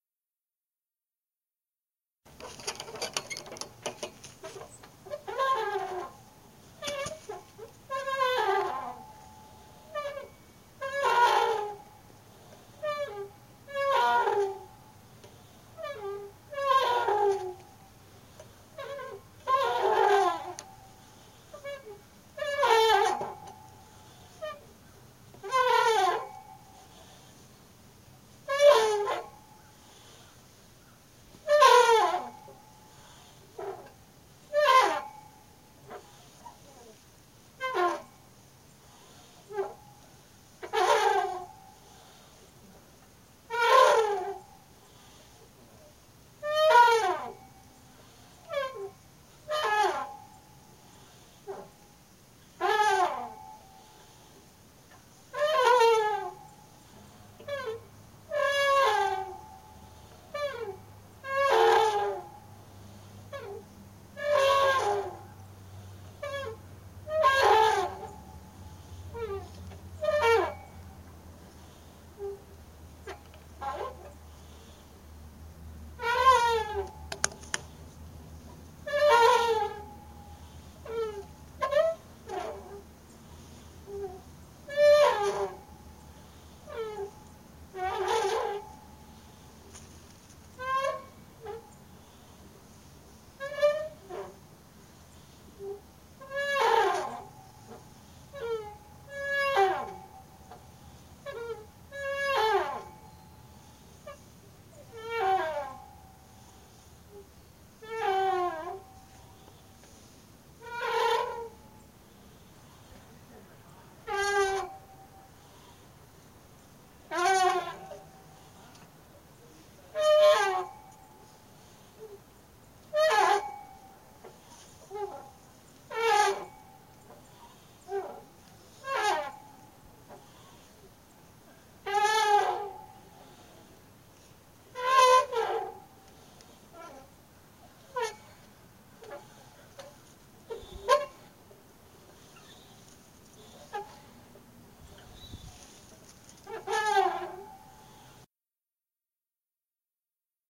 Swing2short RustyResonance AlumSpringPark Nov2011
A recording of swinging on a swing set with beautifully rusted joints. The resonances from all the squeaking as you swing creates some great resonant moments. Recorded with my phone.